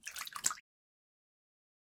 Splashy Drip 001
aquatic, Running, marine, aqua, Water, Sea, Slap, pour, Dripping, Drip, Movie, pouring, River, bloop, wave, crash, Lake, Wet, blop, Run, Splash, Game